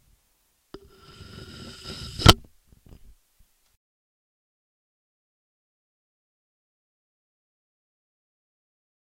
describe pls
Table Riser
I recorded the sound of myself running my dynamic microphone across the edge of the table, thus creating a rising effect.